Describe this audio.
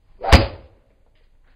hard punch
Sounds like a human being punched.